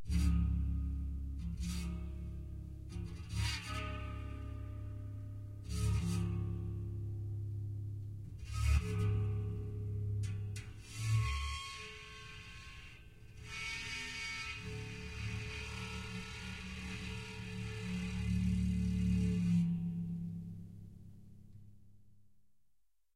metal object number 4 02
recording of an amplified metal object i built recently; a long piece
of metal with a screen door spring attached by two bolts, a guitar
string running the length of one side and a contact mic output box. this is a recording of the guitar string being bowed, played through an amplifier and recorded onto minidisc.
bass, metal, scrape, drone